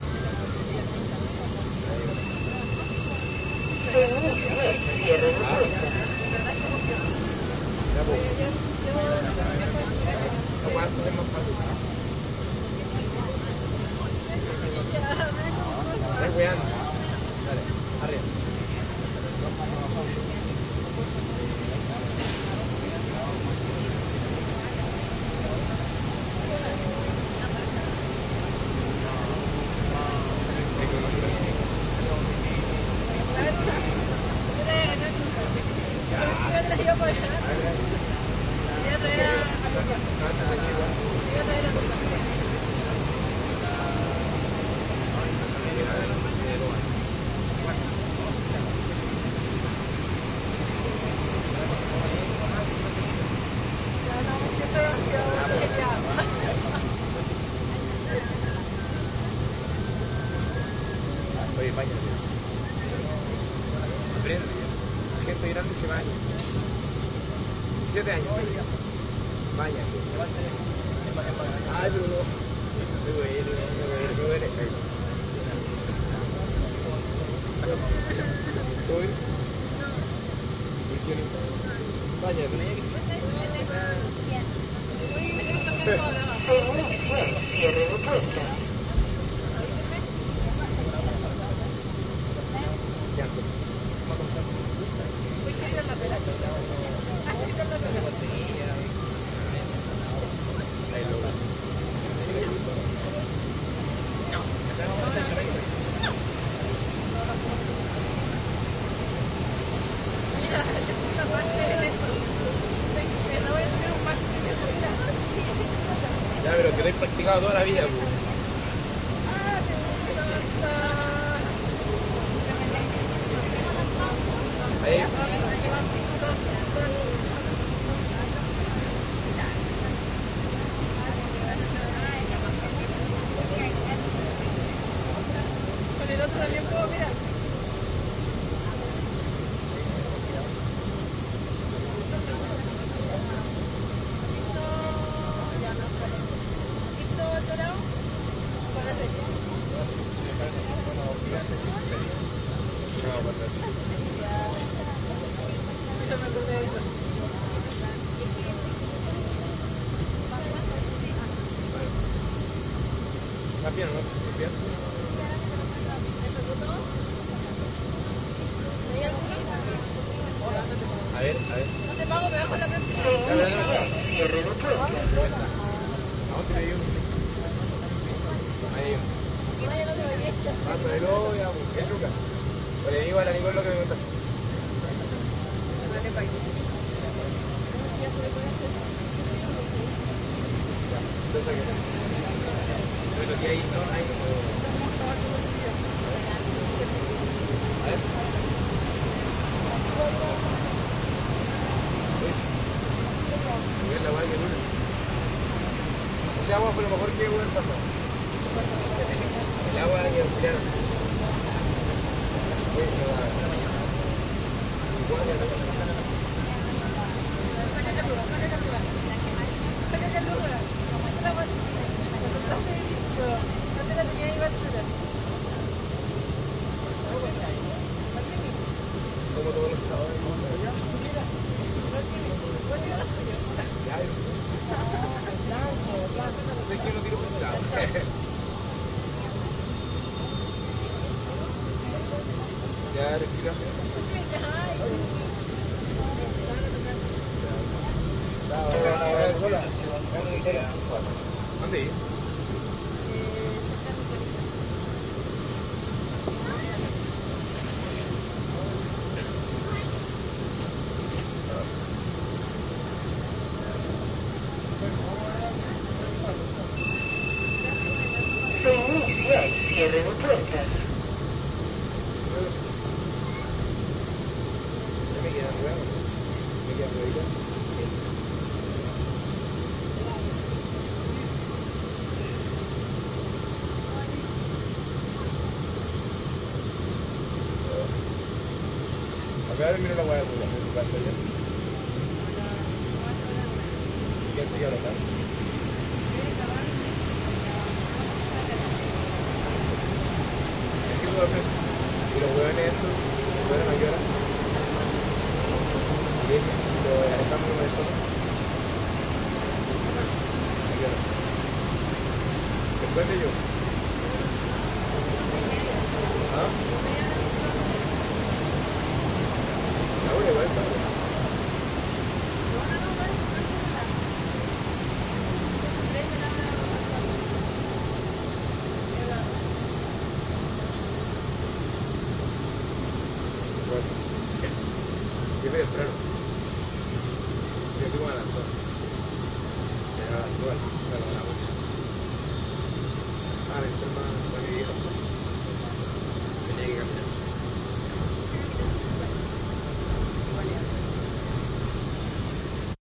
metro 05 - Salvador hasta Tobalaba
Viaje en metro desde estación Salvador hasta Tobalaba, Línea 1, Santiago de Chile. 21 Agosto 2011.